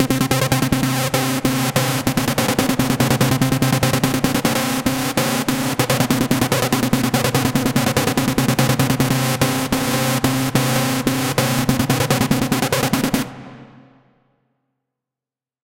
Bass loops for LuSH-101